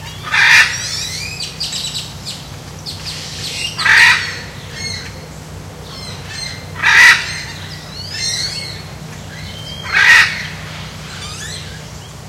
hyacinth macaw02
Loud squawks from a Hyacinth Macaw, with other birds in the background. Recorded with a Zoom H2.
bird, tropical, aviary, birds, exotic, macaw, field-recording, zoo, parrot